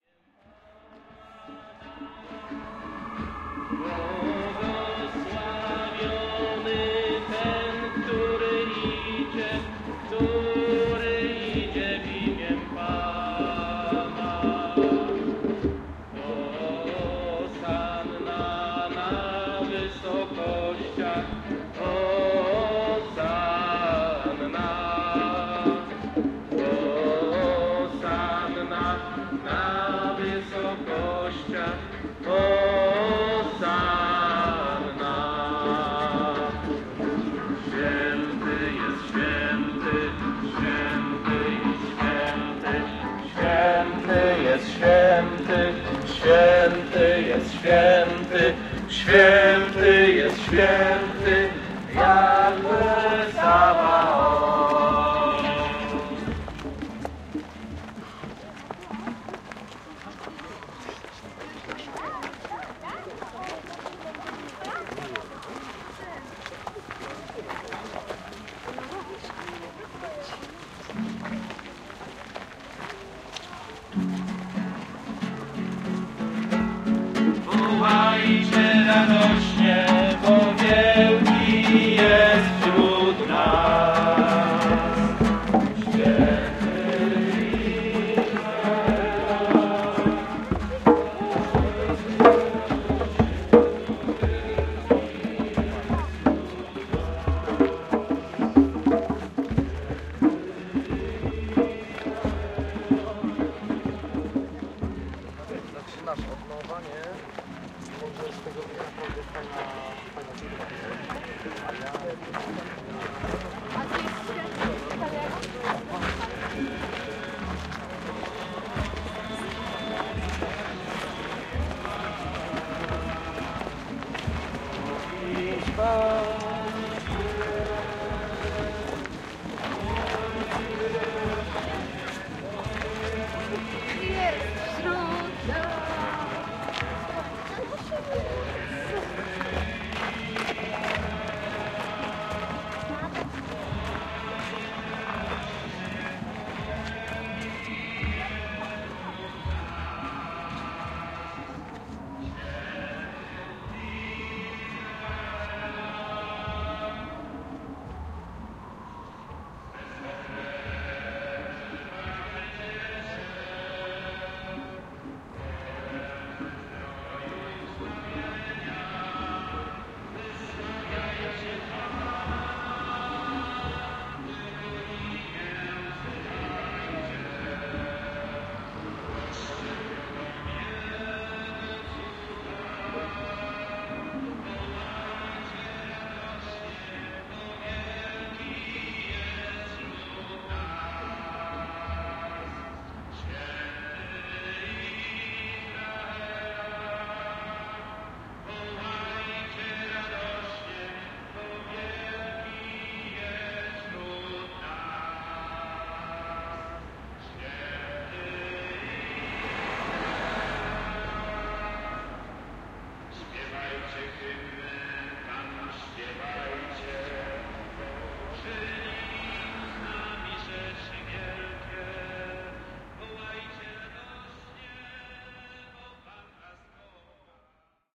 palm sunday procession 19.03.2016 św. wojciech in Poznań
19.03.2016: the Plam Sunday Procession recorded on the Saturday evening (around 9.00 p.m.) in front of the Saint Wojciech Church in the center of Poznań (Św. Wojciech street). Recorder Marantz PMD661MK II + shure vp88 (no processing).